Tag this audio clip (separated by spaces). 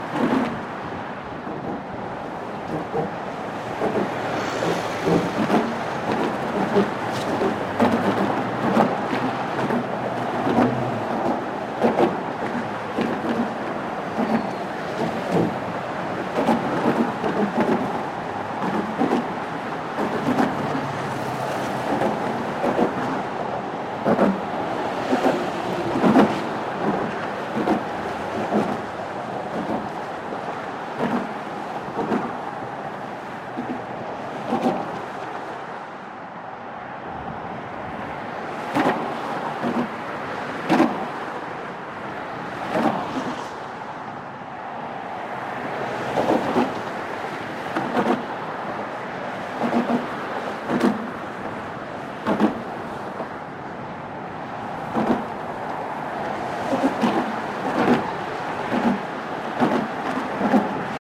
field-recording Tascam NTG4 wikiGong traffic-noise mic bridge WS6 Rode DR-100-Mk3 wind traffic noise San-Francisco Golden-Gate-Bridge road-noise